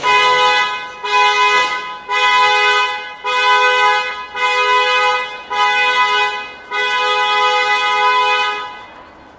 Car alarm honking horn in parking garage